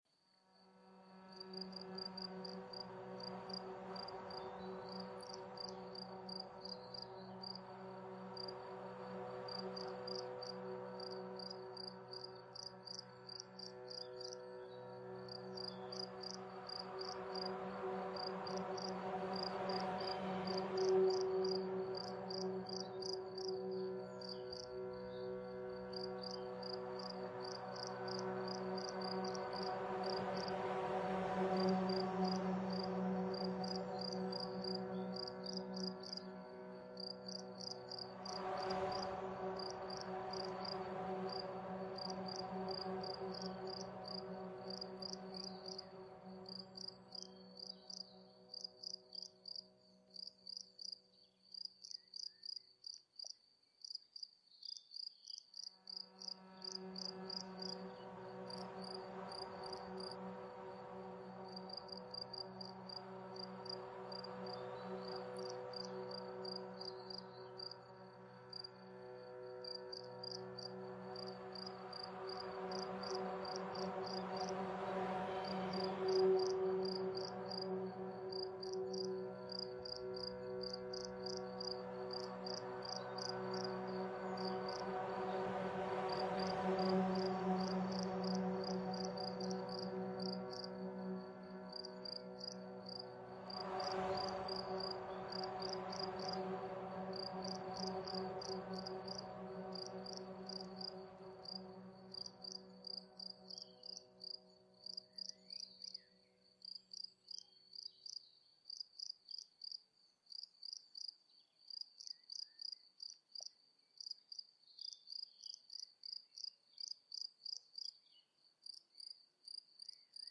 relaxing ambient
A piece of relaxing music. Recorded and edited with audacity.
air, ambience, ambient, atmosphere, crickets, field-recording, nature, night, relaxing, voice